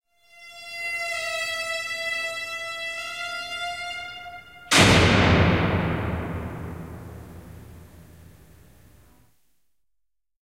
Ovi, raskas metalliovi kiinni, kaiku / A heavy metal door shuts, echo, creak, squeak, door slams, a processed sound
Pitka vinkuva narina, kaikuva ovi jymähtää kiinni. Muokattu.
Äänitetty / Rec: Analoginen nauha, Nagra, muokattu / Analog tape, Nagra, processed
Paikka/Place: Yle Finland / Tehostearkisto / Soundfx-archive
Aika/Date: 1980-luku / 1980s
Narista, Narina, Closing, Steel, Shut, Ovi, Yle, Finnish-Broadcasting-Company